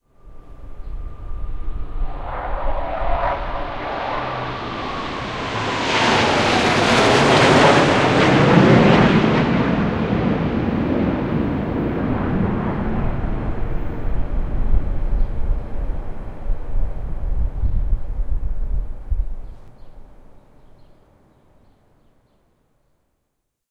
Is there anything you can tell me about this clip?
5 Navy-Blue-Angels-jets CLIP
April 2015. Boston Flyover of Navy Blue Angel jets. Recorded in stereo with Zoom H4n.
navy, blue, boston, angel, jets, flyover, field-recording